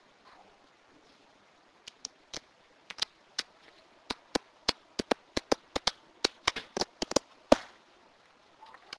Dedos Percutiendo
Field recording of my fingers
field, fingers, percussion, recordings